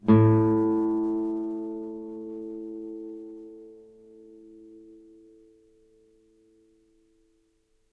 A, on a nylon strung guitar. belongs to samplepack "Notes on nylon guitar".
a, guitar, music, note, nylon, string, strings